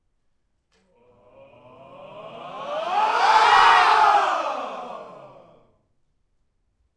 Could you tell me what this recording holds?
Men screaming
Recorded with a Zoom H2 in an auditorium with low reverberation.
A class of approx. 30 - 40 young men using their voice. Starting softly then slowly gaining power and after the climax decreasing their volume again.
screaming, group, voice, men